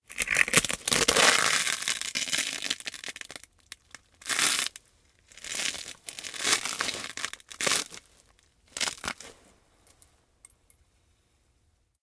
Not just a crush/crunch, but a lot of gritty movement afterward that might prove suitable for footwork in gritty conditions, as with wet, fine-gravel on hard pavement, or some such. It's actually egg-shells on tile. See the pack description for general background.
bug, crack, crackle, crunch, crush, eggshell, egg-shells, grind, grit, quash, smash, smush, squash, squish